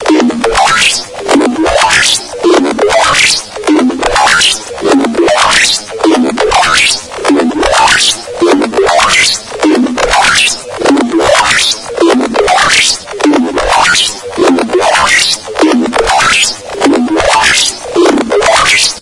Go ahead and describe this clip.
alarm signal 2
A nice alien alarm sounding.